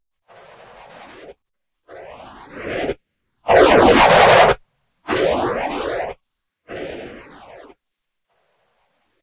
CUT Silence
"Paysage sonore" Boomerang
- Industrial; Spatial.
- Turbines...
^v^v^v^v^v^v^
Jam Under My Own Steam
Atmospheric, Boomerang, Crescendo, Soundscape